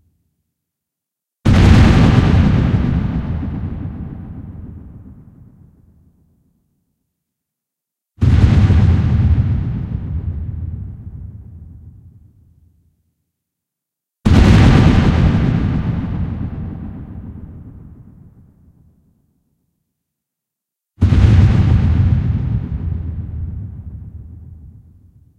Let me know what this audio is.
Ominous Thumps Amplified
Boomy sound with lots of reverb, some delay, and slight panning.
boom, echo, ominous, reverb, thump